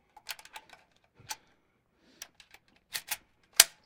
Winchester Rifle Load Ammunition
Loading ammunition into a 22 cal Winchester rifle